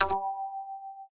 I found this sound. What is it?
synth KS 03
Synthesis of a kind of piano, made by a Karplus-Strong loop.